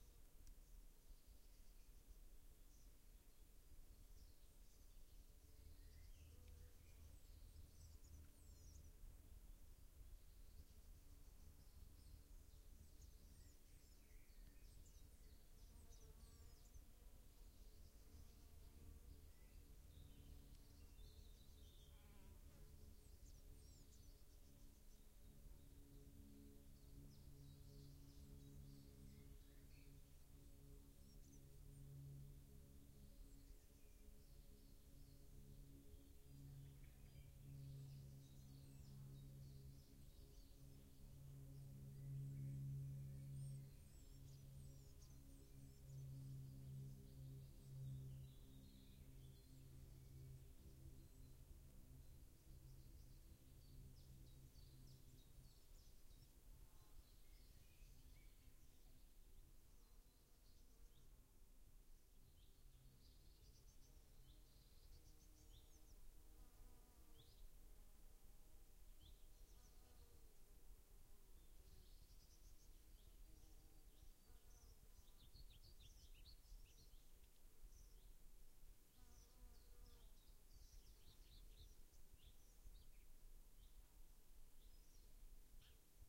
Distant airplane over forrest field
Fieldrecording on a forrest field.
Airplane is fying over in the distance.
distant, forrest, aeroplane, flight, airplane